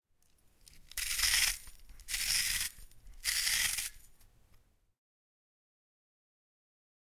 plastic salt mill